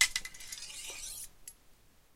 Two swords clash and slide (From the left)
Clash, Hit, Knight, Medieval, Swing, Sword, Ting, Weapon